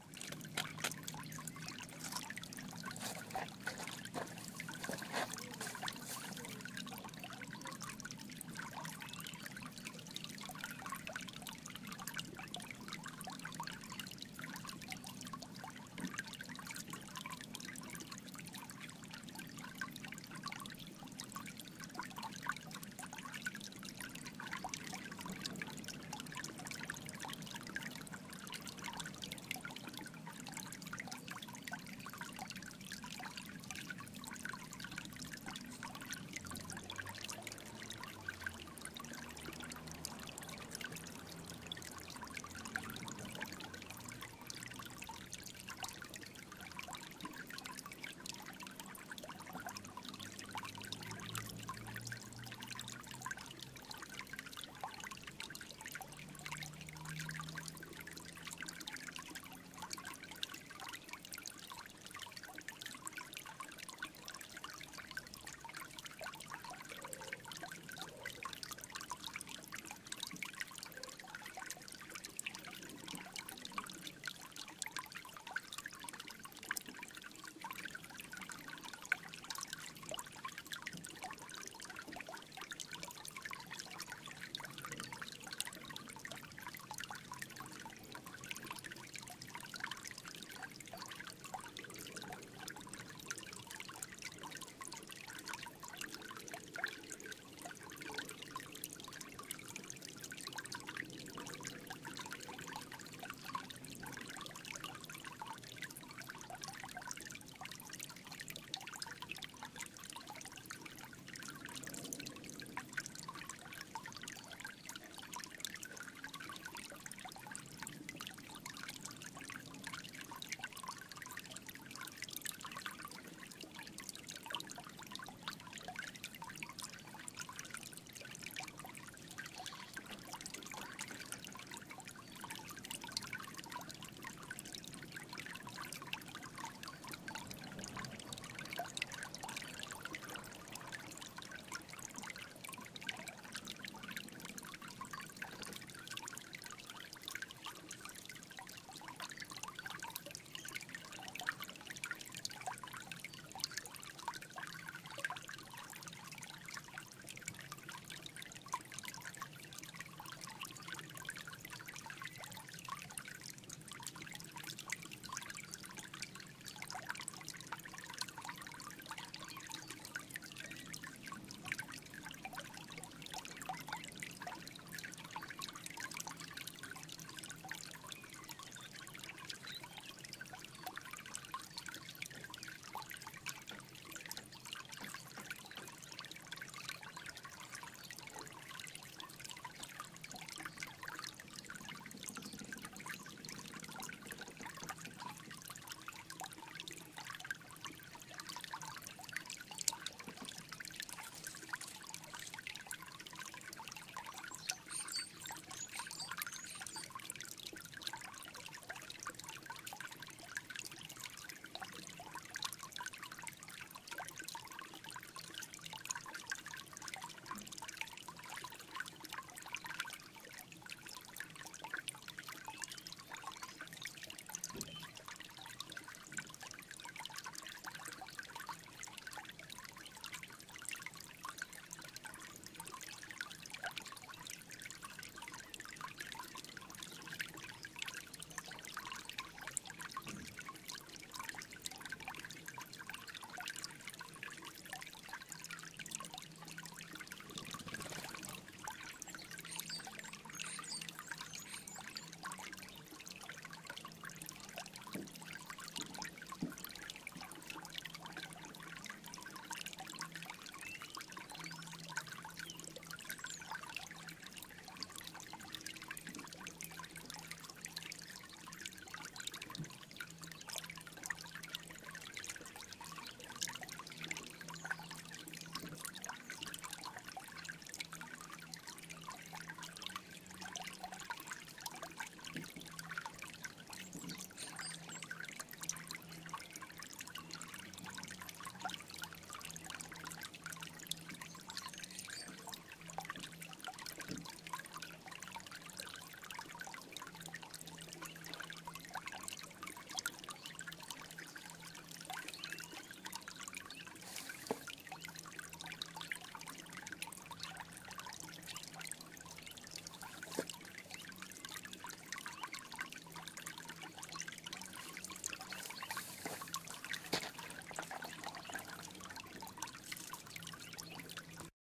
creek long
A recording from a small creek behind my house. Very nice for background sounds or relaxing movie
am, ambient, birds, field-recording, nature, water